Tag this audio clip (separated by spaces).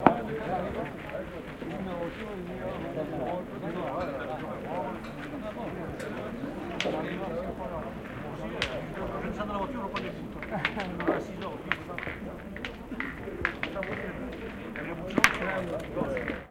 field-recording boules